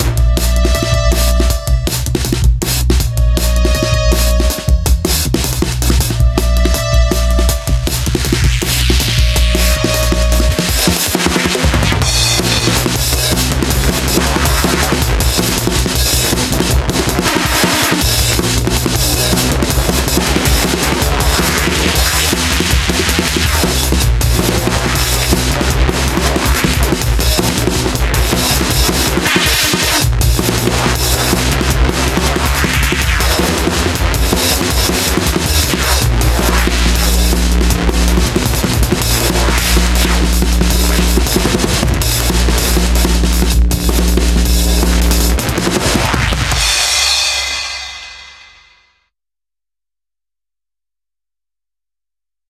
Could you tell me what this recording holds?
Little slice of heavy dnb "music", using a few drumloops I uploaded earlier.
Totally overprocessed and stressful...but...somehow I like it.
beat, hard, 160bpm, bass, distortion, loudness, dnb, mayhem, drumnbass